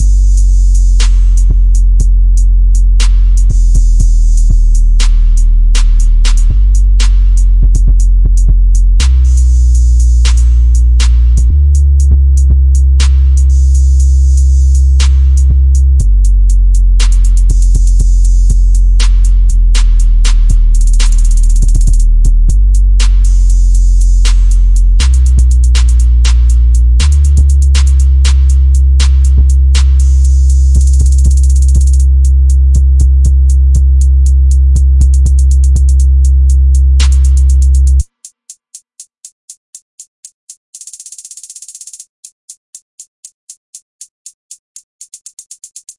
Trap beat agressive type, 120 bpm